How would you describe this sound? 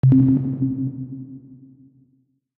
UI Confirmation Alert, B1
Experimenting with the Massive synthesizer, I created some simple synths and played various high pitched notes to emulate a confirmation beep. A dimension expander and delay has been added.
An example of how you might credit is by putting this in the description/credits:
Originally created using the Massive synthesizer and Cubase on 27th September 2017.
alert, beep, bleep, button, click, confirmation, game, gui, interface, menu, ui